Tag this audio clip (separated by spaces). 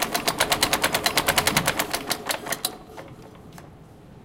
high low Mechanical Machinery machine Industrial Factory Buzz motor medium electric engine Rev